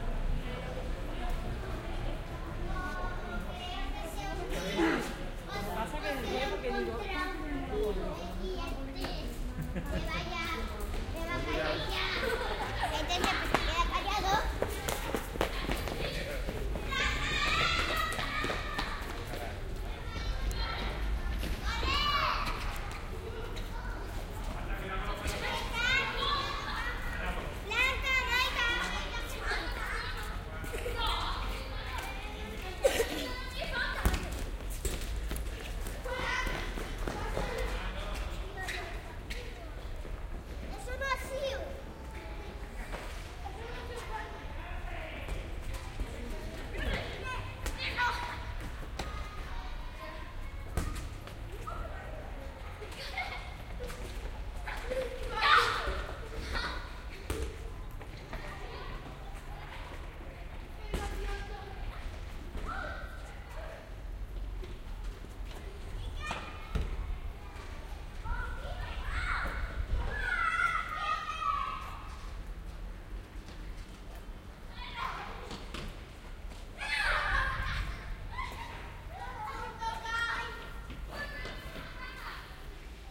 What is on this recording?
20060218.kids.playground
voices of kids playing, unprocessed. Recorded in a courtyard at the Triana district (Seville, S Spain) / voces de niños jugando en un patio del barrio de Triana (Sevilla)